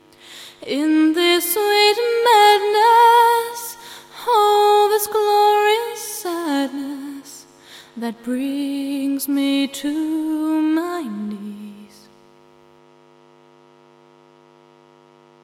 Maximus NoiseGate Tutorial
It is to be gated to remove the 50 Hz electrical hum without losing the quieter parts of the vocal. The file is a remix of a sound by randomroutine and a vocal I recorded of singer - Katy T.